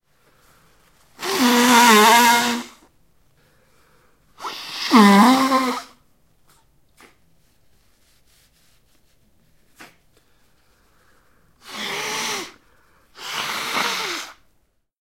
Cleaning Nose
Blowing nose in paper tissues.
Recorded with a Zoom H2. Edited with Audacity.
Plaintext:
HTML:
blow, blowing, blowing-nose, cleaning, flu, nose, slime